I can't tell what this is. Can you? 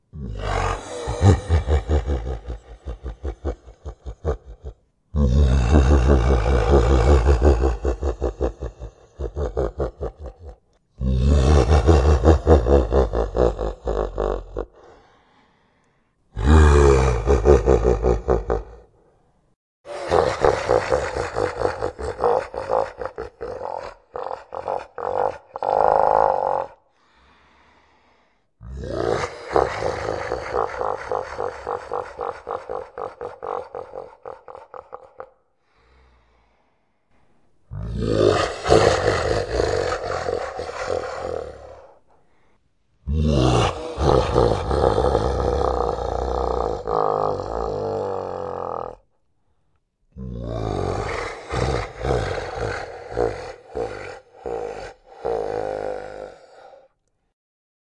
Evil Monster Laughter
Evil creature laughter. Slowed down by 50%.
Recorded with a Zoom H2. Edited with Audacity.
Plaintext:
HTML:
horror, daemon, laughter, voice, laughing, demon, laugh, monster, evil, beast